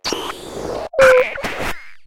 A really strange FM patch that I made on my Nord Modular, he really has a mind of his own.
funny, nord, fm, modular, synth, noise